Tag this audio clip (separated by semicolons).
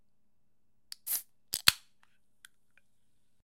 can; opening; soda